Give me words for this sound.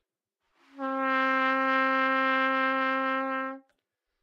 Part of the Good-sounds dataset of monophonic instrumental sounds.
instrument::trumpet
note::C
octave::4
midi note::48
good-sounds-id::2855